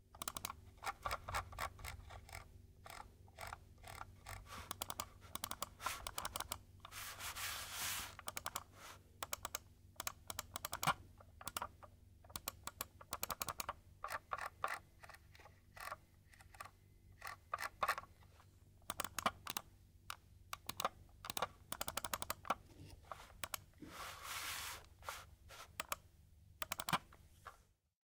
Mouse clicks and scroll wheel use
Some more wireless computer mouse clicks and scroll wheel. Recorded with Sennheiser MKE600 boom mic into Zoom H5.
button, click, close, computer, desktop, effect, game, gamer, left, machine, modern, mouse, movement, old, point, pointer, press, right, scroll, sfx, sound, technology, up, wheel, wireless